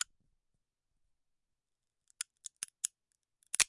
Breaking open a walnut using a metal nutcracker.
crack, nut, shell, walnut